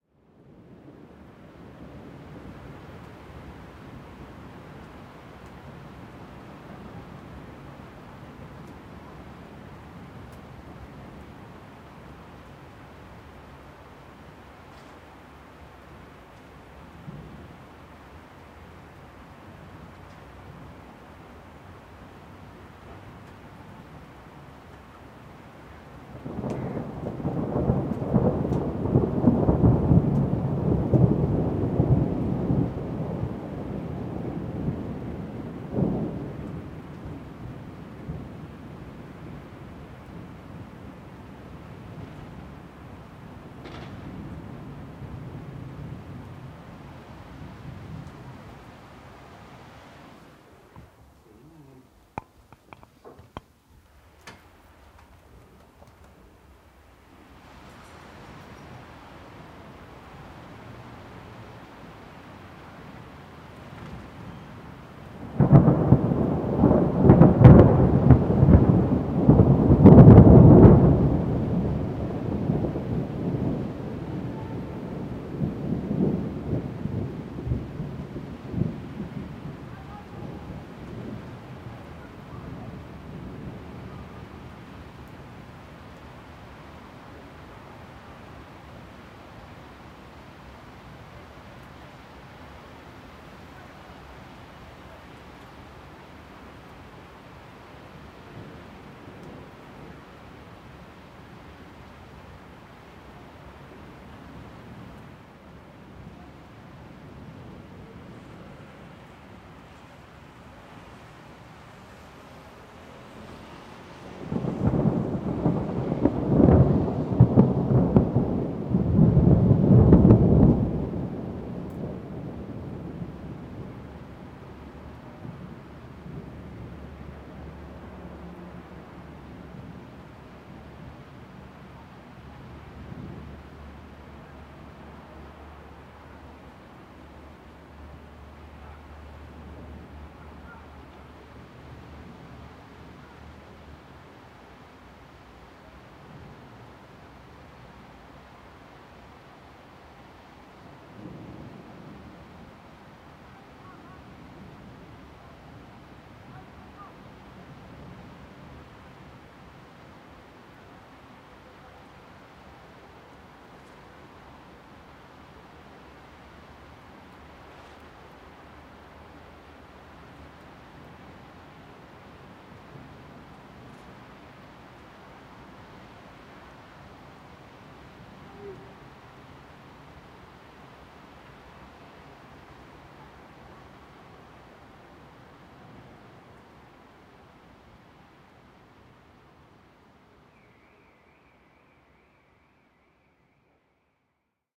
THUNDER Milano
3 massive Thunders recorded in Milano with Sennheiser short-gun and Zoom.
i like them so much so i like to share them.
enjoy
F.
rain, rumble, Thunder